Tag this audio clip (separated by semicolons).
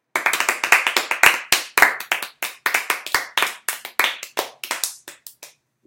aplaudir; Clap; clapping; claps; hand; hands